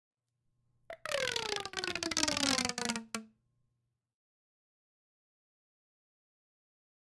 Toy accordeon Tube
A dollar store rubber accordeon plastic toy
Rode ntg2